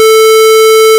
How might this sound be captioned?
special fx audio